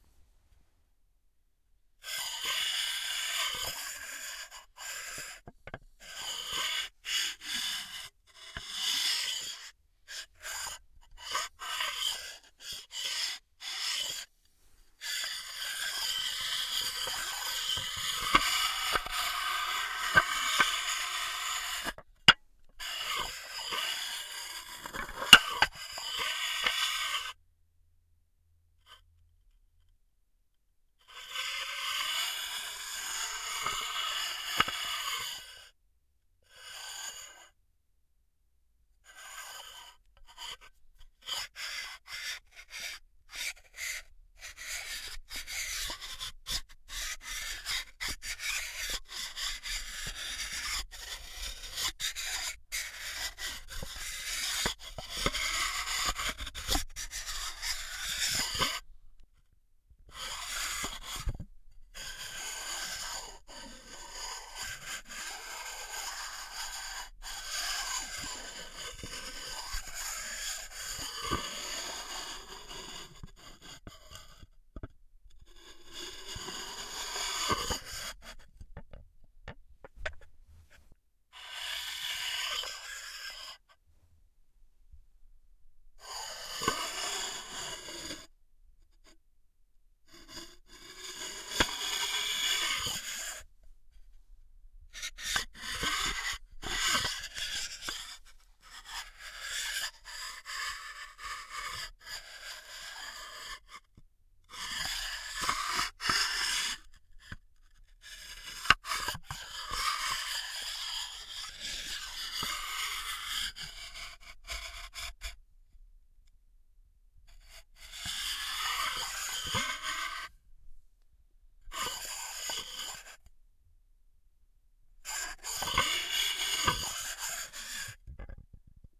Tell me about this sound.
Shivering Sound 01 - Stoneware plates rubbed
Really unpleasant noises in this pack.
They were made for a study about sounds that creates a shiver.
Not a "psychological" but a physical one.
Interior - Stereo recording.
Tascam DAT DA-P1 recorder + AKG SE300B microphones - CK91 capsules (cardioid)